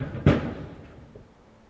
120619 10 Single Rock
Taking apart a stone wall next door and loading it into a dump truck to be sold for building materials. The recording is in the nature of self defense. This is one particular concise crash. Recorded on a Canon s21s..
metal, dump-truck